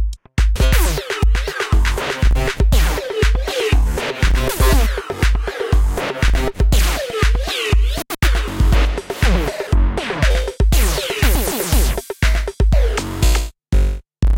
Laser Like Beat 1
A beat that contains laser-like sounds.
Complex, High-Q, Laser, Synth